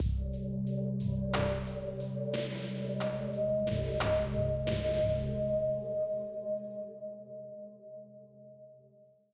As an internship at the Utrecht School of the Arts,
Adaptive Sound and Music for Games was investigated. For the use of
adaptable non-linear music for games a toolkit was developed to
administrate metadata of audio-fragments. In this metadata information
was stored regarding some states (for example 'suspense', or 'relaxed'
etc.) and possible successors. This specific cell can be succeeded by
ambience, chill, dark, drone, drones, fragment, game, game-music, music, non-linear, non-linear-music, static, suspense, synthesized, synthesizer, underwater, water